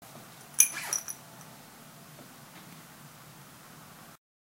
MySounds GWAEtoy Recording
field, TCR, recording